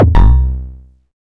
Kick 04 Early Frenchcore iElectribe
By Roy Weterings
I used this for making Early Frenchcore tracks in Ableton Live.
200, Bpm, Early, Frenchcore, Gabber, Hardcore, Kick